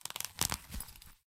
screw-up
litter is being screwed up with a plastic sound
screwed, rubbish